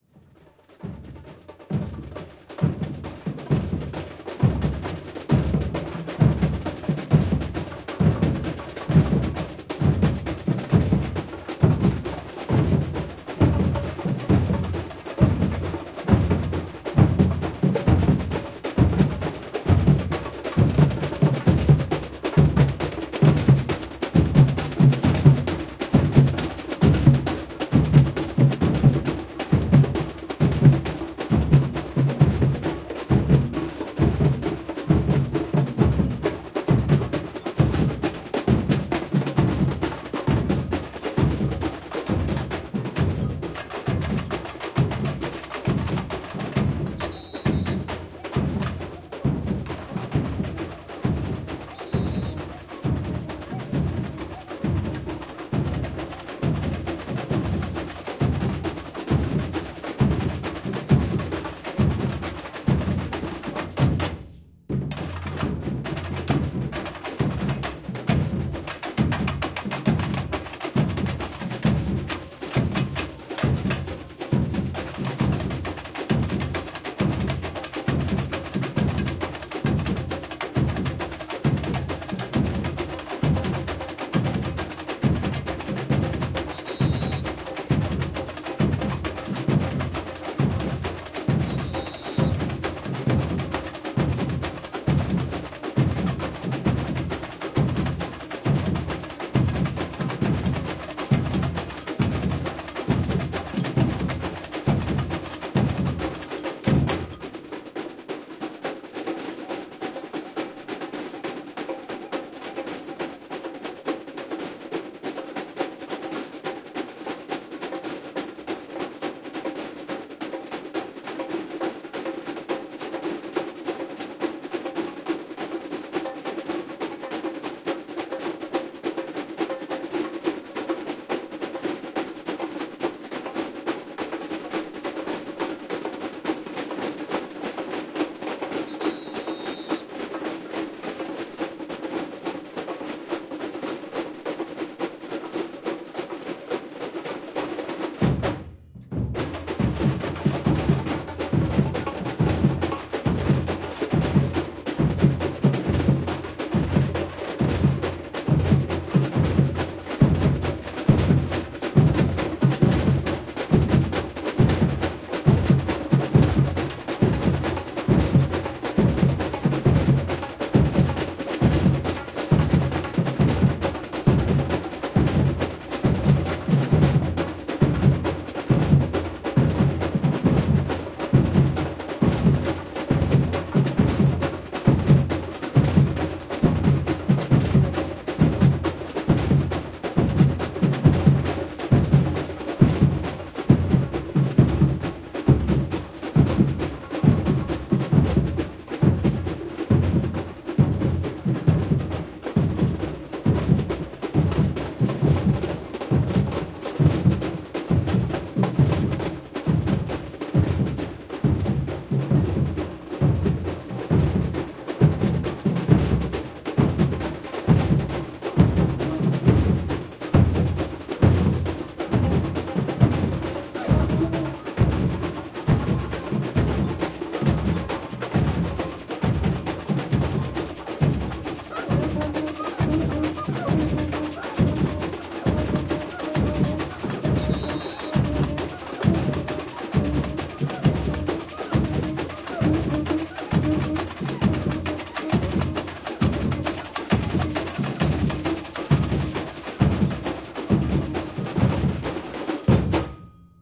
110611-000 bateria rehearsal mangueira style
Samba batucada rehearsal at the Berlin Carnival of Cultures June 2011(Karneval der Kulturen). The band is playing the rhythm style of the Samba school of Mangueira from Rio de Janeiro, Brazil. Zoom H4n
bateria, batucada, berlin, carnival, drum, escola-de-samba, karneval, percussion, rio, samba-rhythm